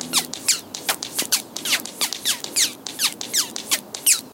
Just some incoming ricochet i've recorded with my minidisc
some years ago.
Hope You Like:)